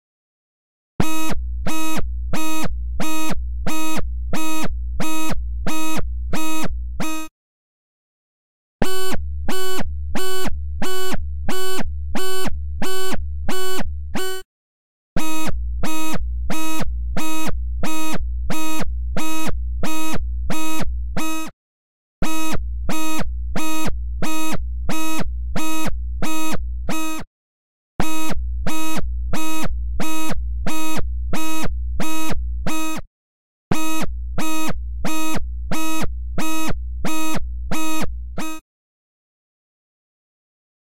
Alarm type sound
A synthesized sound that sounds like an alarm
alarm, generated, sound, synth